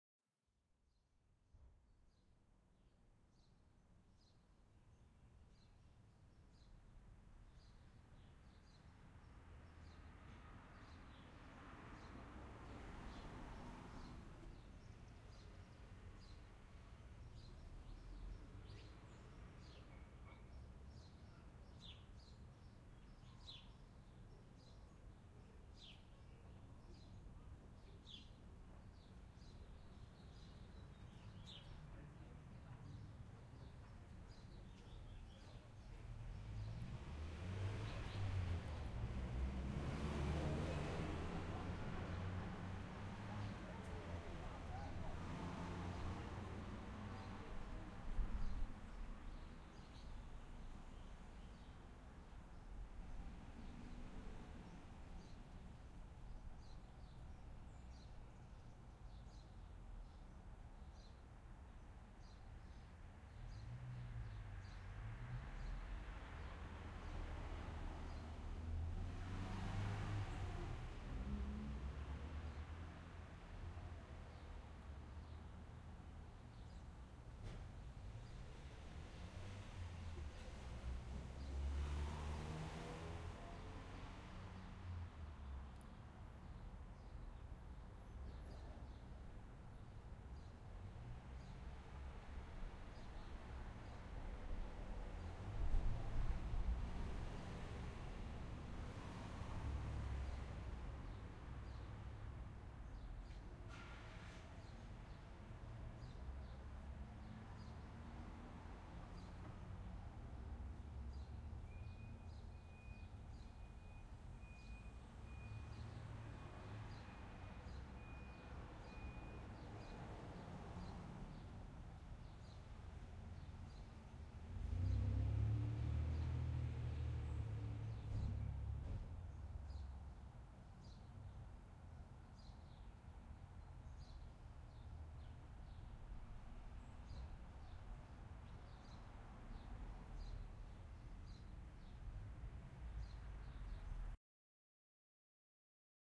mp spring
A field recording with light traffic, birds in the background, medium distance.
traffic, birds, field-recording